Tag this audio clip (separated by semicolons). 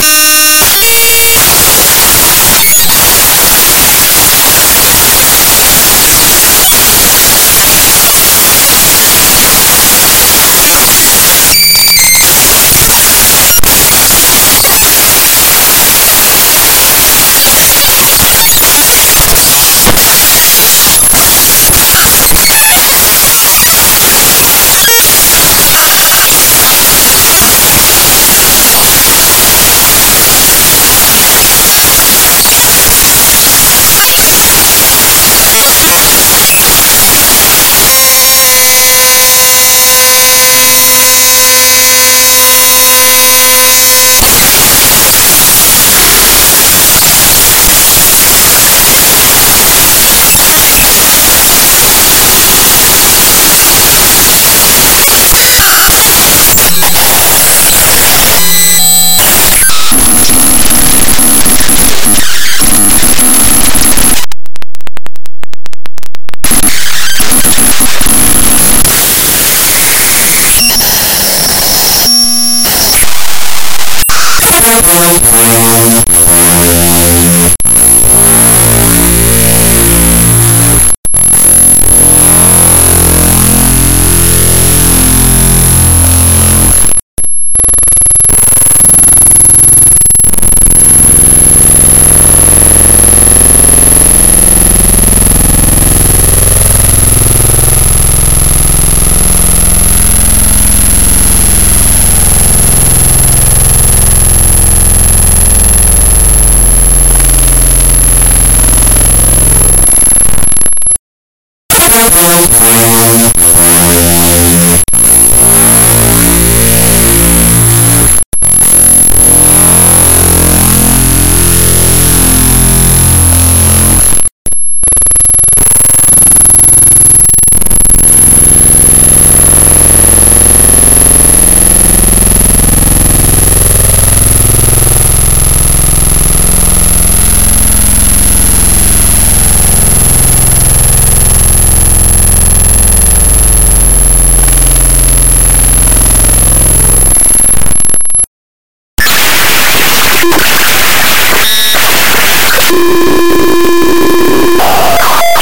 glitch; loud; RAWdata